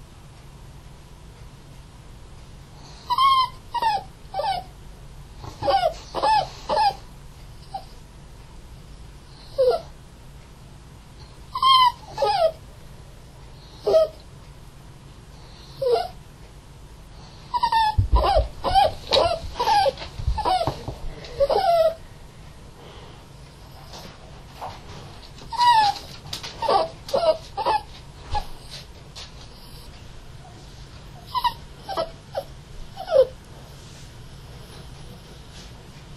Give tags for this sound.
cry whine poodle dog

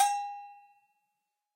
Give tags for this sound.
latin samba bells percussion hit cha-cha

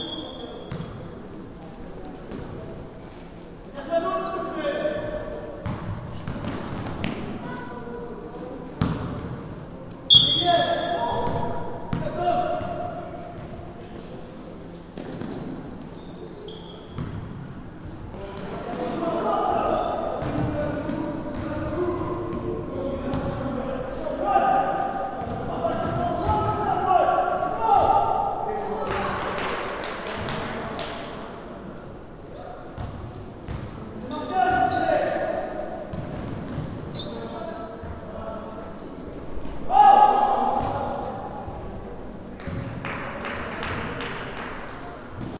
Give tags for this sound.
basketball sport game shouting bounce

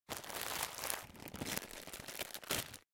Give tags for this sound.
bag crackle Plastic OWE

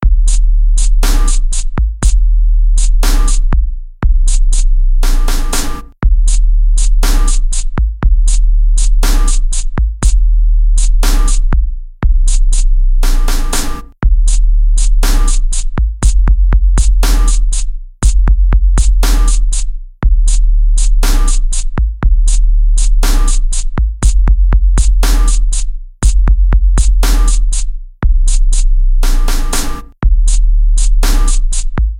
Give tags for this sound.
Beat Rap

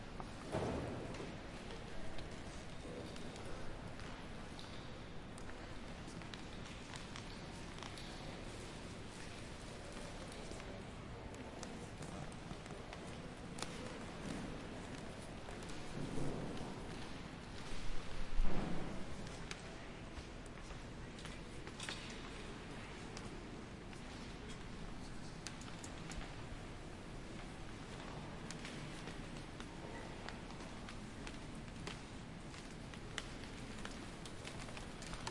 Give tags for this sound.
library quiet soft

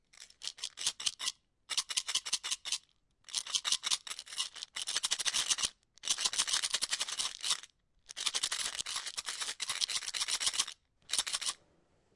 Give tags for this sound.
container pills

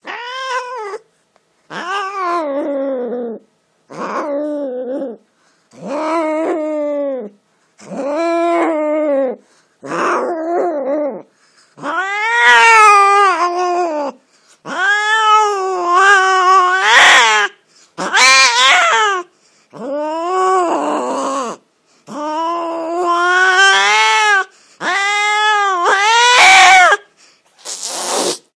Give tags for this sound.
horny moaning animal cat

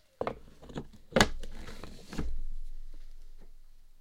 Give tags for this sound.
coffee espresso inserting machine hopper

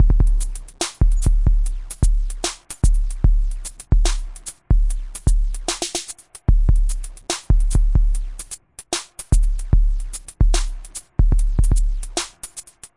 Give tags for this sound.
funk electro machine drum

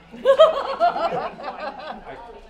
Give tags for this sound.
foley guffaw laugh laughter voices

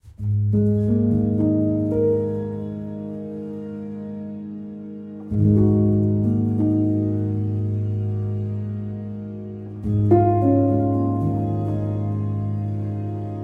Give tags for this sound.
ambient chill eurorack kit kontakt make morphagene noir noise piano

